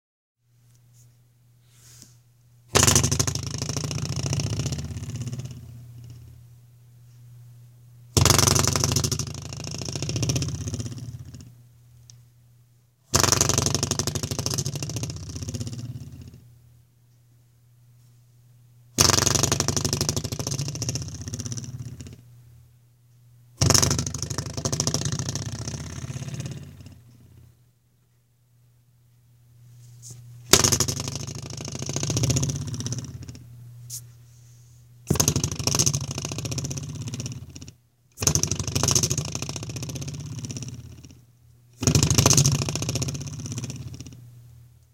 door stopper twang
Recording of repeatedly flicking a metal spring door stopper attached to a wall.